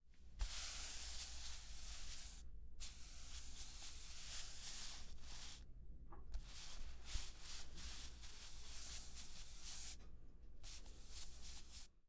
17. Roce tela con madera
touch or clothing and wood
clothing, wood